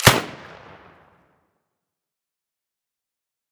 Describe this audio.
I went for a more realistic gun sound without the Hollywood subbass and a lot cleaner than my previous work
Made in ableton live, i just layered an ak47, an m16, an enfield rifle and an acoustic kick drum together with eq and used parallel compression to gel them together. Transient designers were used on the layers to make them snap harder.
A bolt slide forward sample and a bolt slide back sample were added to give some mechanical feels to the gunshot.